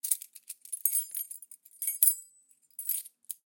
Keys Jingling 1 5
Design, Door, Foley, Jingle, Jingling, Key, Keys, Lock, Rattle, Real, Recording, Sound